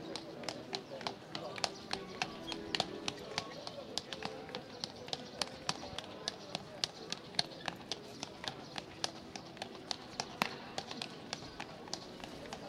horse and musicians in the in town
horse, musicians, old, town, track